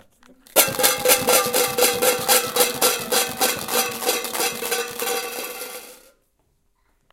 mySounds EBG Gonçalo
Sounds from objects that are beloved to the participant pupils at the Primary School of Gualtar, Portugal. The source of the sounds has to be guessed.